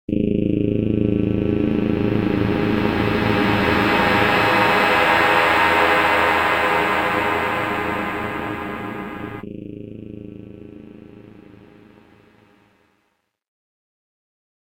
Low Dive Bomb Drones
I Created this sound using the ipod app droneo(i do all my recordings with an ipod touch $th generation).it was then processed using the ipod app sample wiz and recorded using the ipod app twisted wave,linked up using the ipod app audiobus.I hope you all enjoy and/or are able to use this